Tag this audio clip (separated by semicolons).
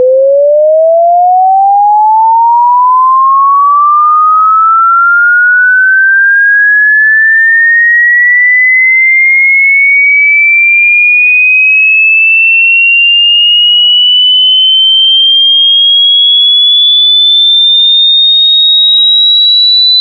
assessment; audio; audiometric; ear; hearing; microphone; test; testing